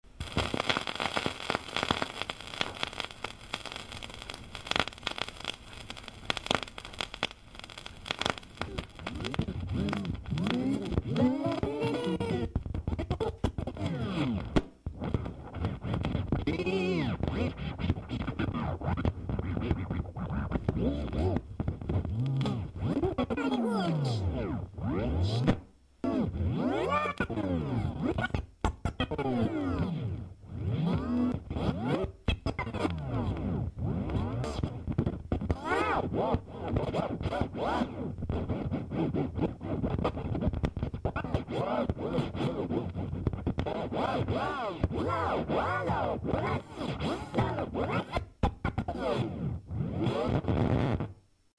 Grattage son1
scratch "record player"
record-player, scratch